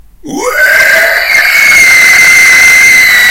Homme sac poubelle